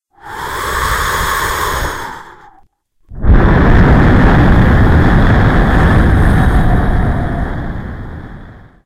wind monster01
air, beast, breathe, monster, wind